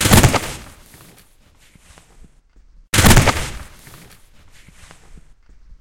A dinosaur falls to the ground. Heavy impact. Made with dufflebag, laundry bag, leather couch cushions and a plastic bag.
Second impact has a slightly different EQ
impact, floor, giant, drop, creature, ground, heavy, dinosaur, monster, falling, thud, fall